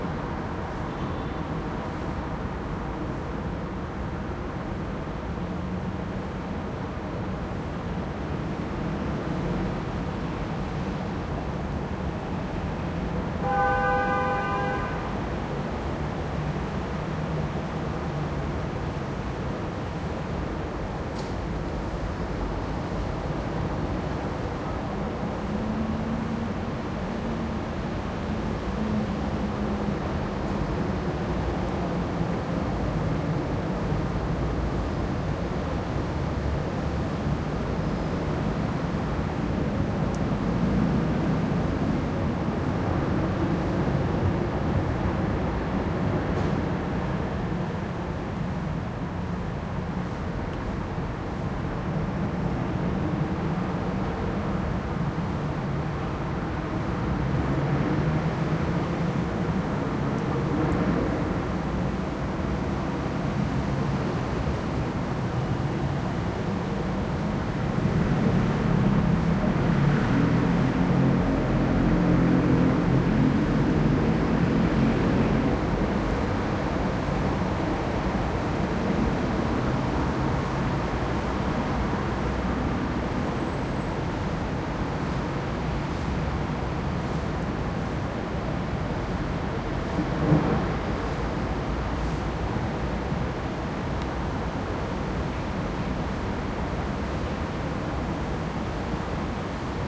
140812 Vienna SummerMorningWA R
Wide range 4ch surround recording of the summer morning cityscape in Vienna/Austria in the 13th district by Schönbrunn Castle. The recorder is positioned approx. 25m above street level, providing a richly textured european urban backdrop.
Recording conducted with a Zoom H2.
These are the REAR channels, mics set to 120° dispersion.